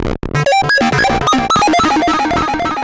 These short noise loops were made with a free buggy TB-303 emulator VST.